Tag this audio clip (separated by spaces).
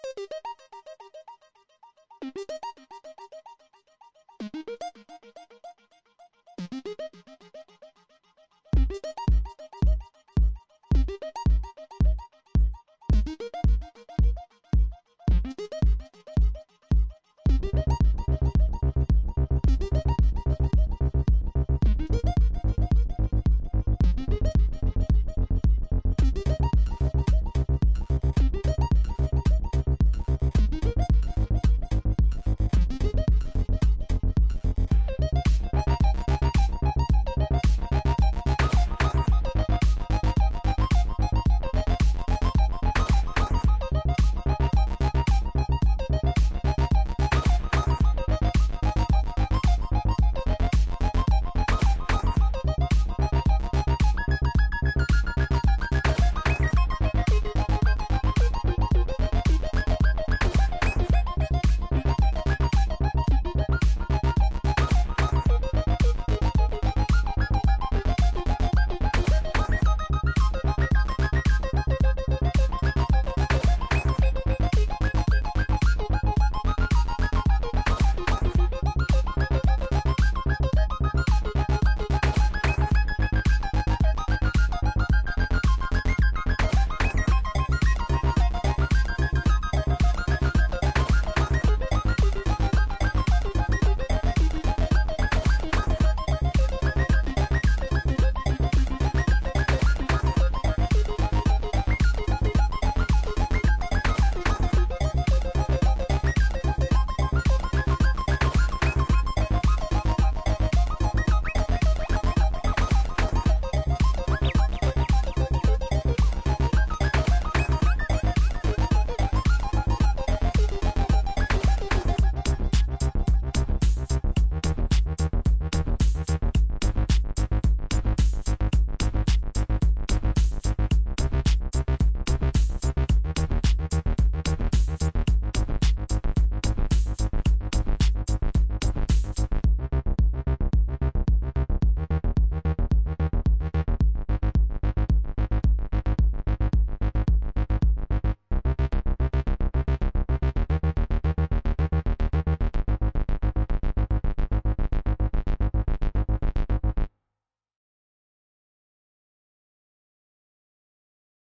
hardware live midi electronic synth analog synthesizer processed techno loop experimental dance electro